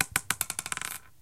basically, this is the recording of a little stone falling on the floor, faster or slower, depending on the recording.
rock, stone